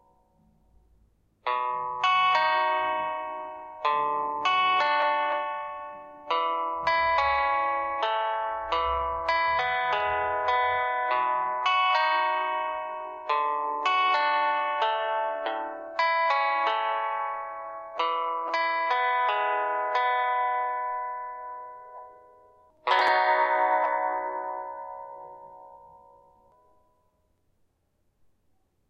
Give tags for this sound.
loop; finger-style; calming; electric; guitar; solo; relaxed; beat; sting

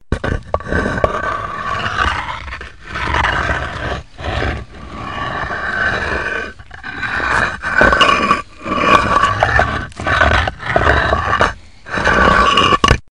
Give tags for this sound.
builder; work; construction-site; spooky; site; constructing; Monster; construction

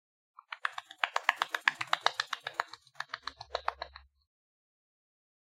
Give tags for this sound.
final; golpes; sonido

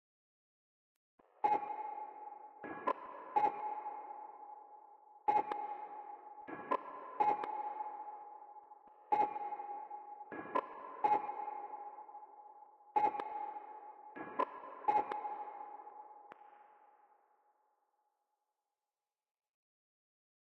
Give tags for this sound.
darkness; led; loop; night; percussion; sonar; toolbox

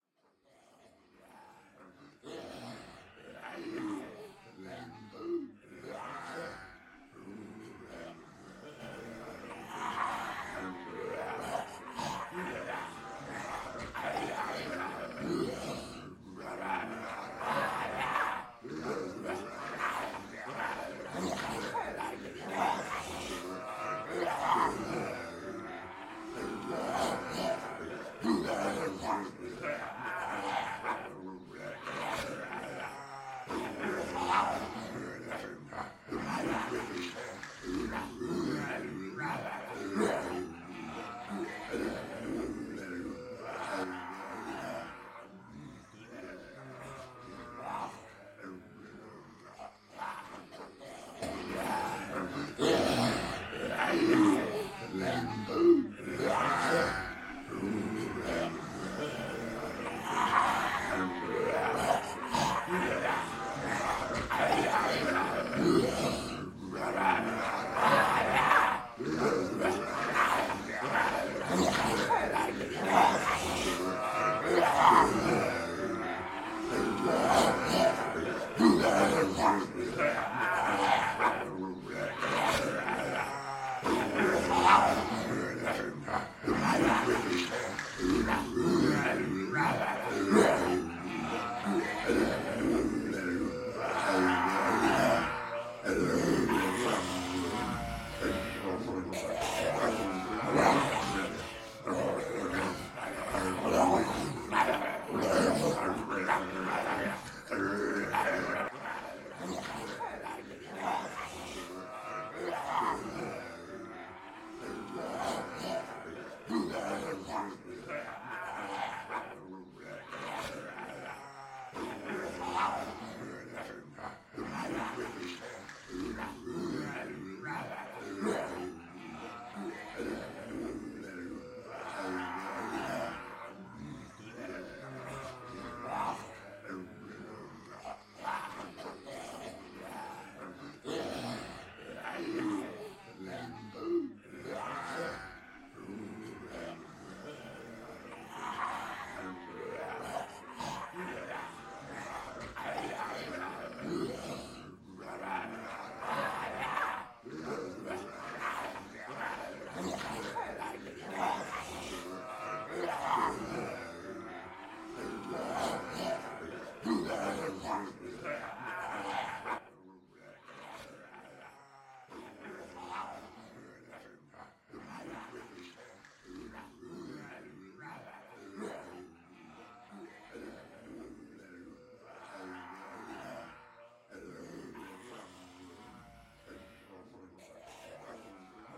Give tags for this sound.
group; horror; undead; zombie; dead-season

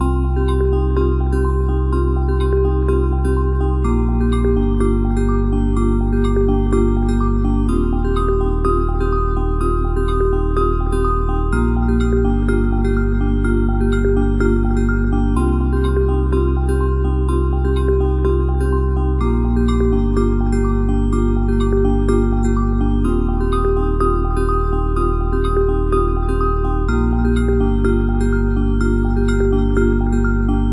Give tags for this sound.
House; Synth

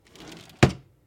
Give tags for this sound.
close; Drawer; Household; Junk; Kitchen; shut; Small; Wood; Wooden

